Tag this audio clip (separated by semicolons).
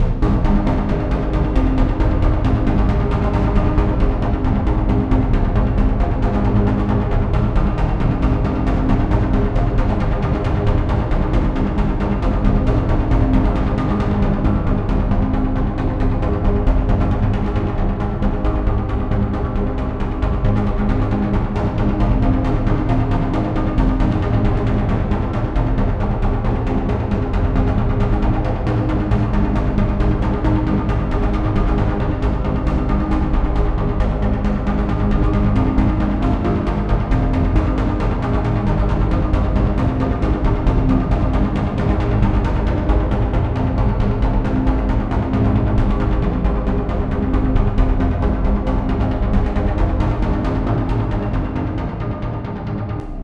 atmosphere; attention-getting; beat; bpm; dance; electro; electronic; experimental; fast; happy; loop; pad; processed; rhythm; rhythmic; synth; synthesizer; upbeat